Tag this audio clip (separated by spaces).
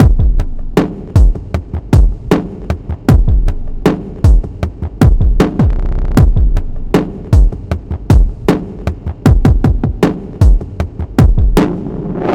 club hop soundesign slow beat remix chill beats hip filter phat drum processed producer downbeat chillout hiphop triphop electro trip-hop downtempo dj